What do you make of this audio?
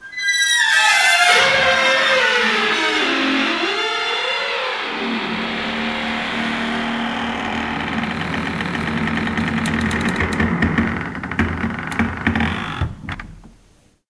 Door of our toilet are really squeaky, recorded at my house in Slovakia